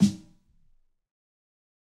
Fat Snare EASY 005
This is The Fat Snare of God expanded, improved, and played with rubber sticks. there are more softer hits, for a better feeling at fills.
kit, realistic, drum, snare, fat, rubber, sticks, god